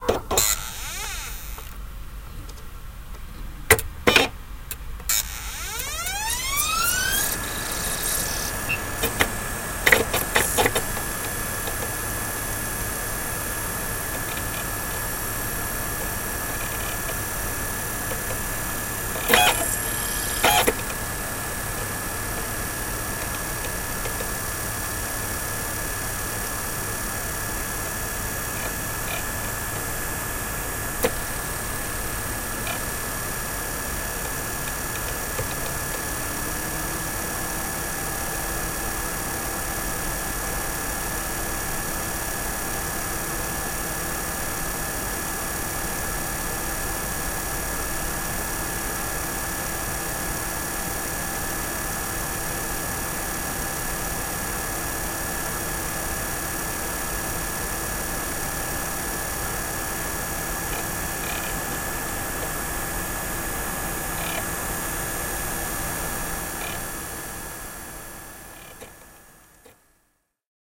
Computer - Laptop - CD - Spin up
Laptop CDROM drive spins up, spins down, resets head, spins up again, and reads disk.
computer, spinup, laptop